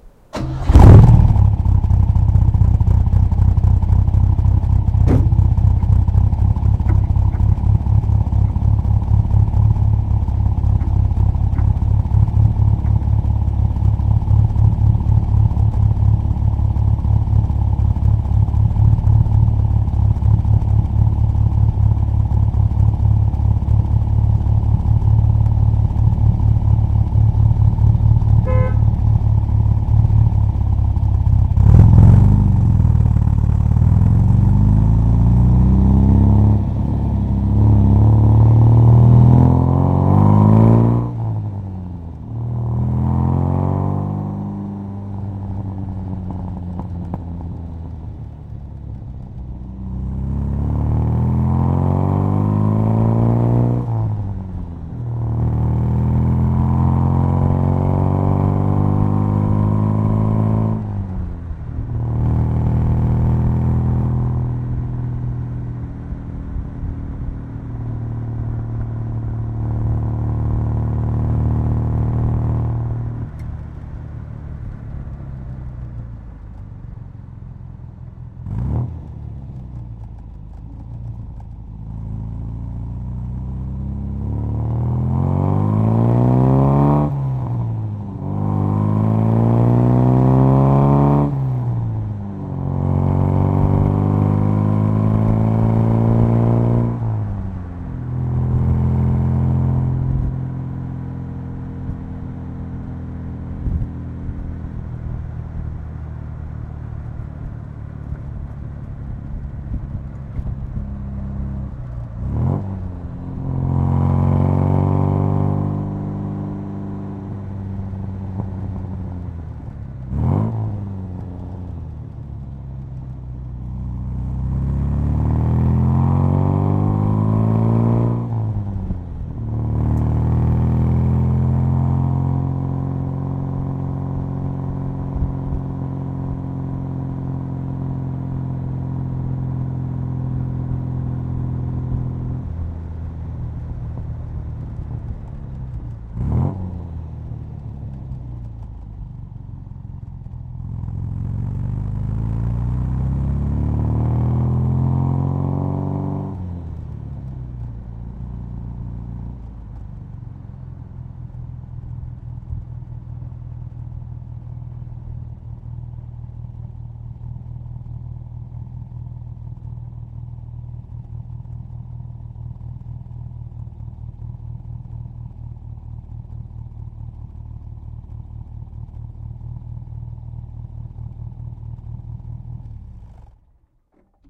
MGB In cabin start dive shut off
Recorded using a 1974 MGB sports car. Mic placed on passenger floorboard. Sound of start, idle, accelerating and going through gears, steady state (can be edited and looped for longer scenes) and coast down and shut off. Very throaty sound recorded in-cabin which would be period correct for British cars of that era and useful for in-car scenes behind dialog.